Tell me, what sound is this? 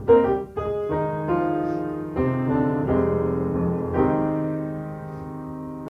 Piano Passage 2
Some snippets played while ago on old grand piano